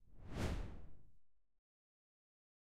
whoosh med low
A simple whoosh effect. Medium-length and low.
air, fast, fly-by, gust, pass-by, swish, swoosh, whoosh, wind